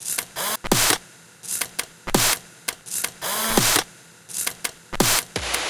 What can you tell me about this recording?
Rhythmic Drum loop using sounds from a Polaroid camera,vinyl scratches and New Era 808 VST. 84BPM , no Kick. Have fun!
84, BPM, Drum, Loop